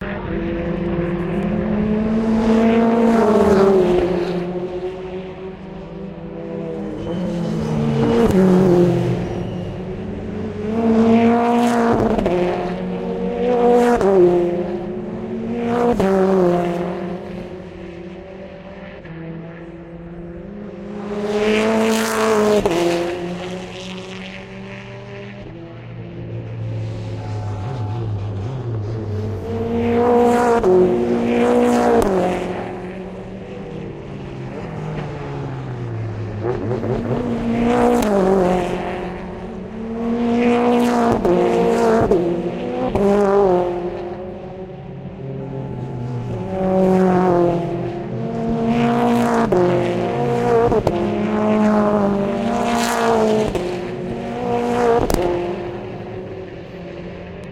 The sound of British Touring Cars racing around Thruxton during qualifying. Stereo. Recorded with Nokia Lumia 1520